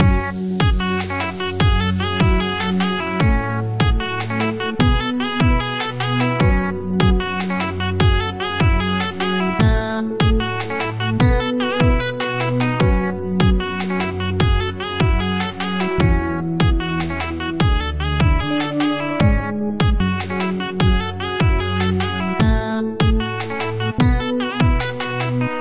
I used Ableton to create a suspense loop.